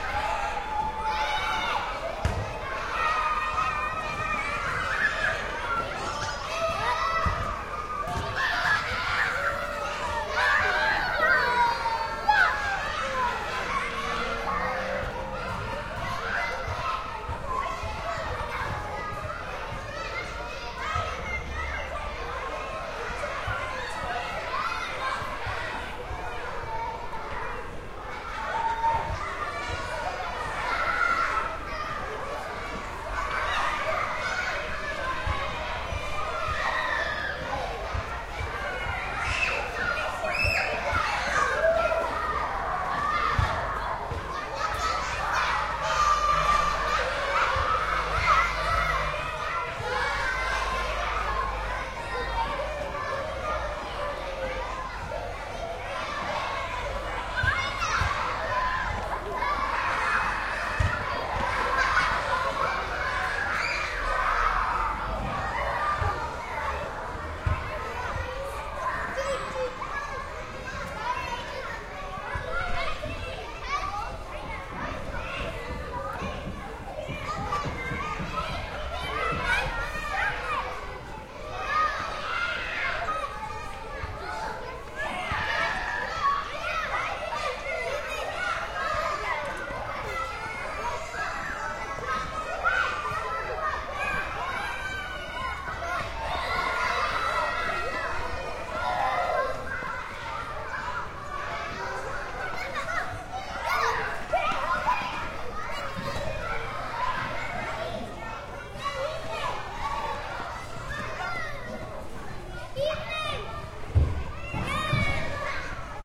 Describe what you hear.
Elementary school playground at recess; lots of happy shrieks. Louder and screamier in first half, quieter in second. Recorded with Microtrack recorder.
children, exterior, joy, kids, outdoors, play, playground, shrieks, yells